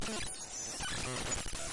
Computer Glitching, Digital Data Corruption, 02-04 LOOP
Importing raw data into a digital audio workstation, with a distorted, corrupted, glitched result as if the computer has an error or is malfunctioning. Seamlessly loopable.
One of many sounds included in the 96 General Library SFX pack.
An example of how you might credit is by putting this in the description/credits:
The sound was created digitally on 5th November 2020.
break, breaking, broken, computer, corrupt, corruption, data, digital, glitch, glitched, glitching, hack, loop